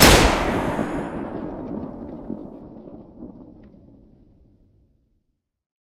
Single Gunshot 2 V2
A variation of my Single Gunshot 2, created with Audacity.
Gunshot, Rifle, Sniper